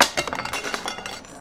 generic glass break
I used one of those blank plastic cds that you sometimes get when you buy CD holders. I broke it up into many pieces and dropped them multiple times adding on the ending to make it sound like the leftover pieces bounce. Sorry about the backround noises, recorded on a laptop with the fan running and a TV in the backround. Came out pretty good though. I was satisfied in the end though.
break, cd, glass, simple